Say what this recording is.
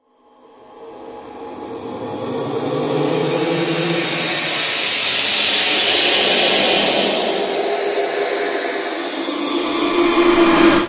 Horror sound
A scary sound the was created by playing in audacity with a sneeze. Original sound recorded on a Samsung Galaxy S3
creepy, drama, ghost, halloween, haunted, horror, phantom, scary, scifi, sinister, space, spectre, spooky